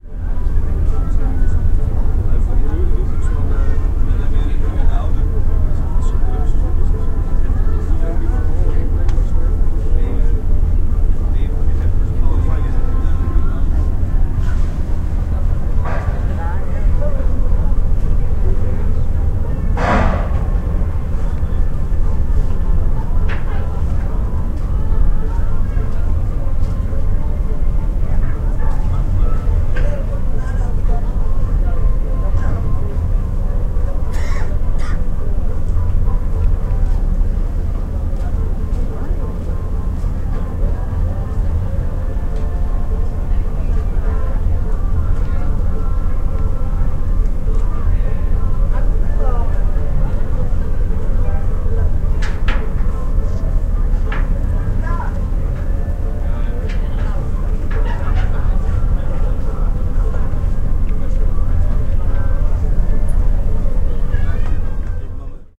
20070624 084532 op de boot naar bali
On a ferry to Bali. Java, Indonesia.
- Recorded with iPod with iTalk internal mic.
ferry, field-recording, indonesia